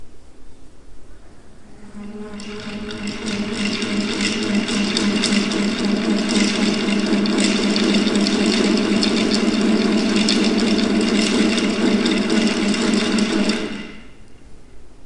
A "Wind Wand" from folk instrument company Lark in the Morning (a kind of bullroarer consisting of a kind of mast supporting several large rubber bands which is swung around the head).
turbine, rotor, propeller, fan, blades, bullroarer, rubber, band, air, rotate